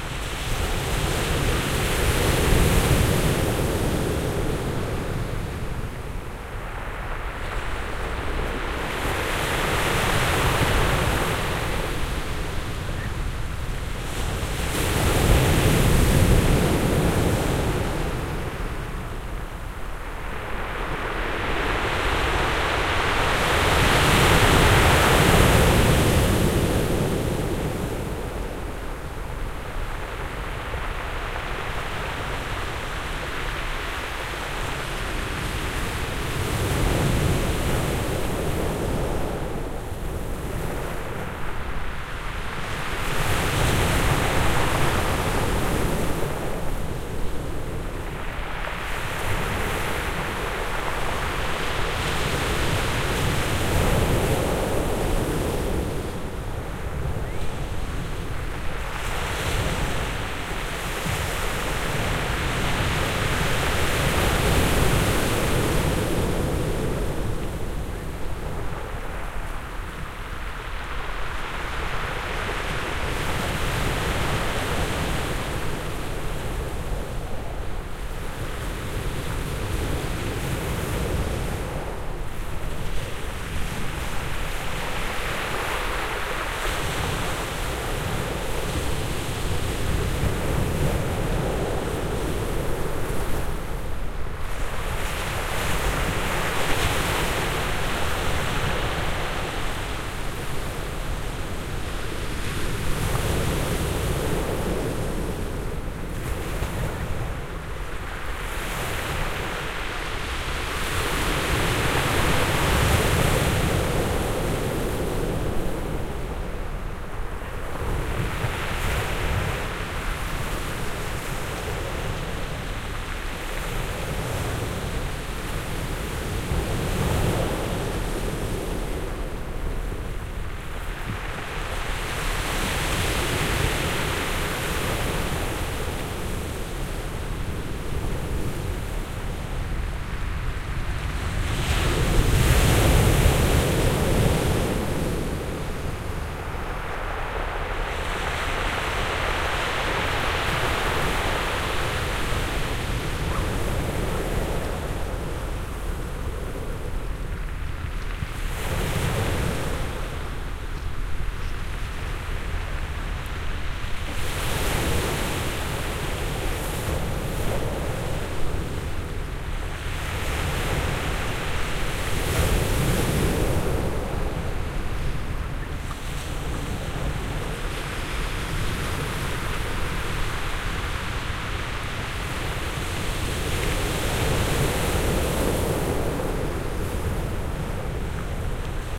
On the gravel beach in Le Havre, France